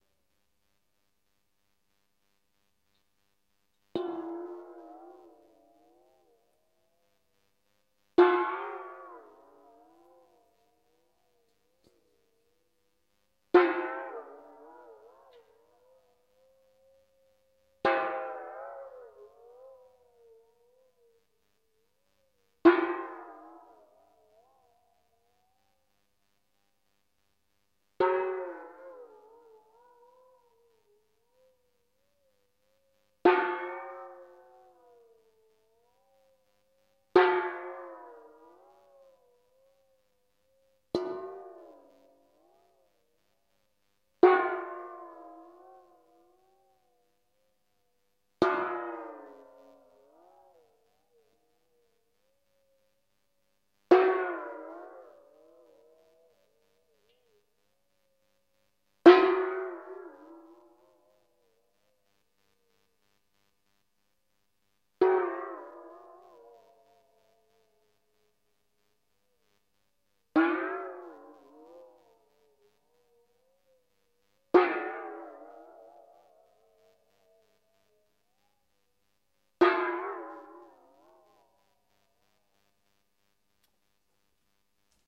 I took a metal pan and put some water in it. I used a med. hard felt timpani mallet and used a TASCAM DR-40 recorder. Thanks.
Experimental, Percussion, Water